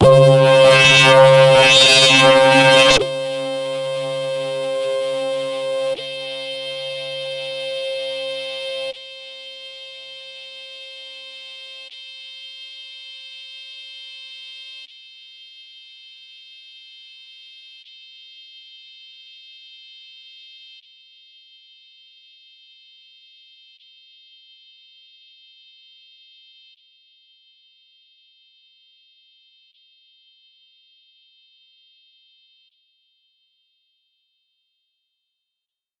Short sound with quite some harmonic content, a lot of square content, followed by a fading high pass delay. Created with RGC Z3TA+ VSTi within Cubase 5. The name of the key played on the keyboard is going from C1 till C6 and is in the name of the file.